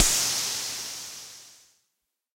EH CRASH DRUM19
electro harmonix crash drum